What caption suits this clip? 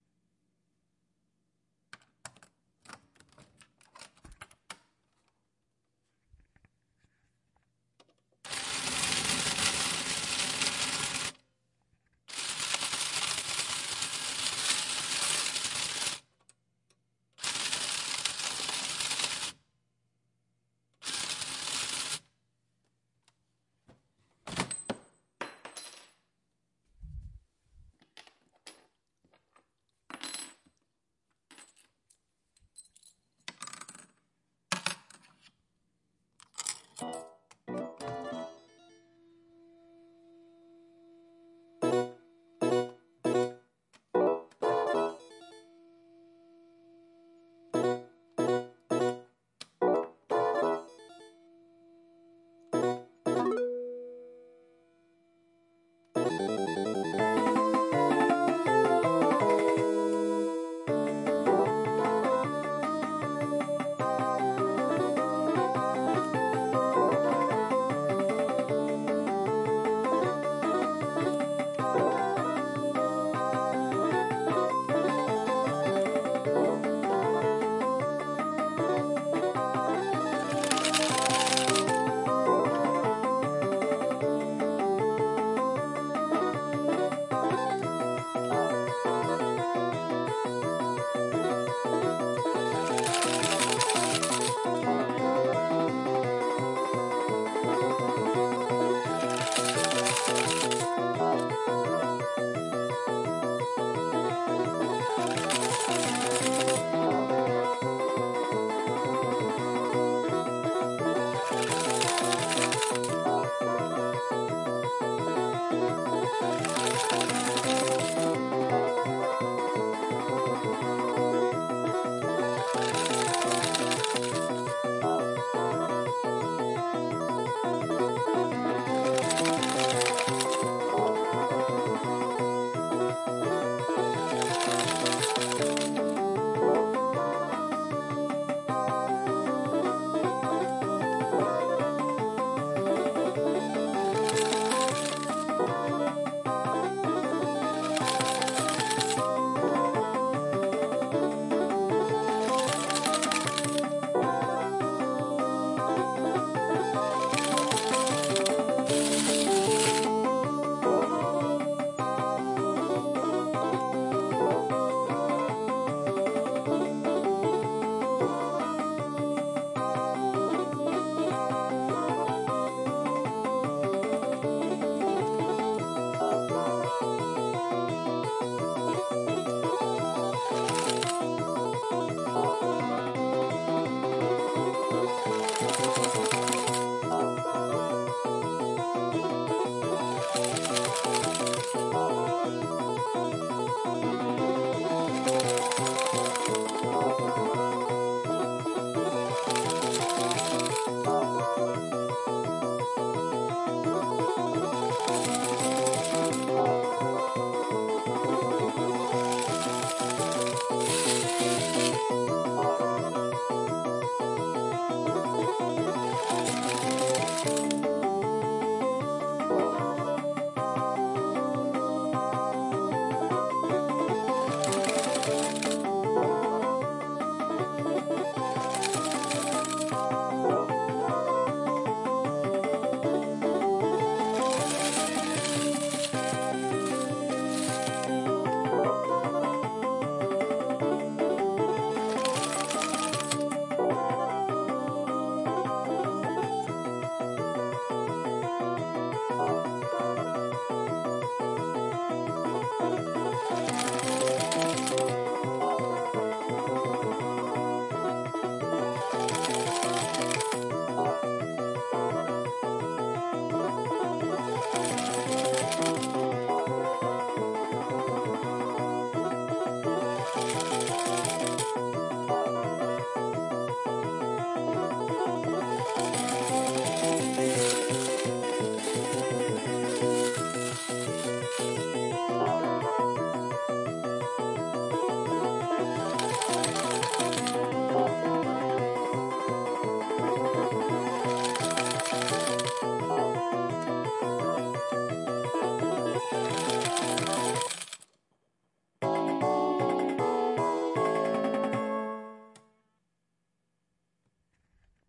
BIG SLOT PAYOUT 0331
General slot play on Japanese Slot Machine with payout. Tascam D-100